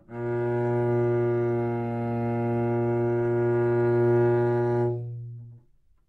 overall quality of single note - cello - A#2
Part of the Good-sounds dataset of monophonic instrumental sounds.
instrument::cello
note::Asharp
octave::2
midi note::34
good-sounds-id::2152
Intentionally played as an example of bad-pitch-errors